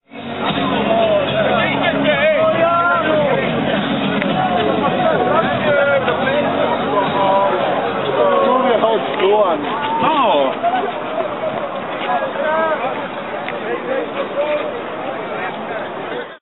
accidental documentation of spontaneous celebration of fans of the Poznan football team Lech-Kolejorz which won the Polish championship. Recordings are made by my friend from England Paul Vickers (he has used his camera) who was in the center of Poznan because of so called Annual Museums Night. It was on 15.05.2010. The celebration has placed on Old Market in Poznanń. In this recording we can hear the Lech-Kolejorz bugle call that started the celebration and by the way the devastation of the center of Poznan. This recording is made on Roundabout Kaponiera about 2 km from the Old market.